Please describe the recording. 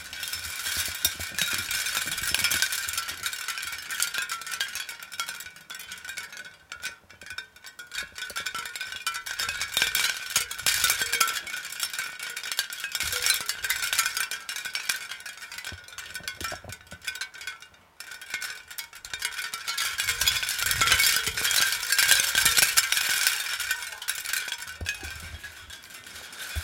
A Lamp made out of little shells in the bedroom rattling with the summer breeze coming through the windows
atmosphere, organic